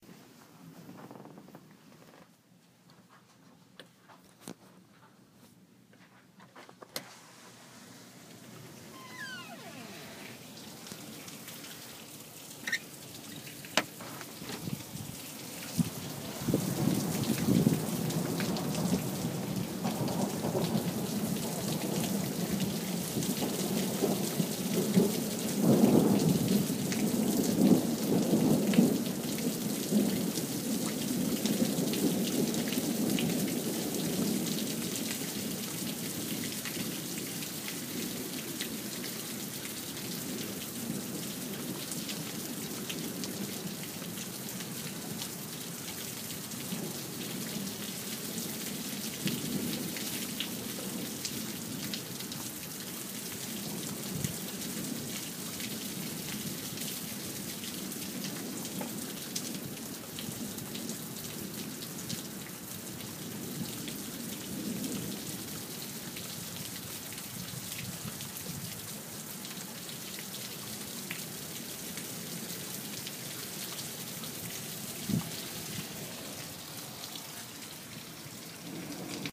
Thunder lighting creeking door and rain splashing recorded on an iphone 4